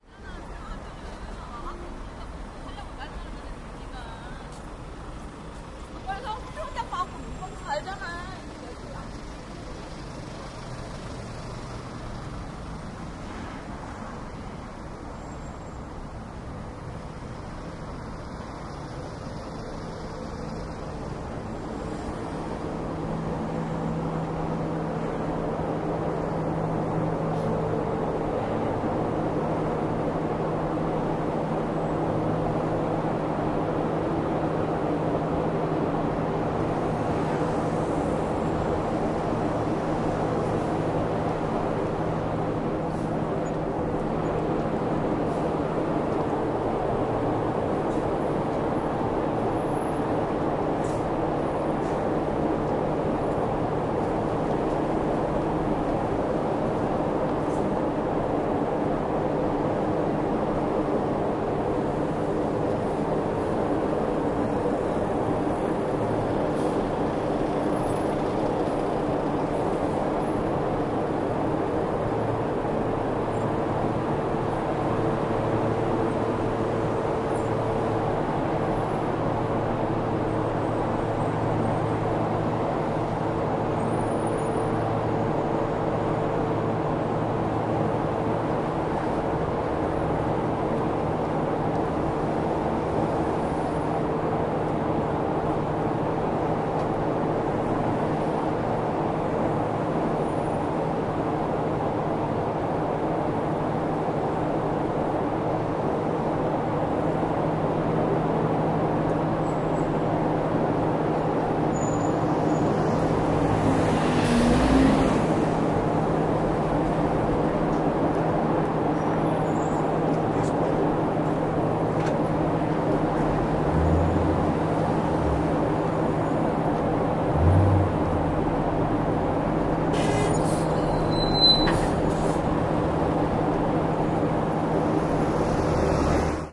0167 Ventilation traffic
Girl talking korean. Traffic. Ventilation. Bus.
20120212
engine, field-recording, korea, korean, machine, seoul, traffic, voice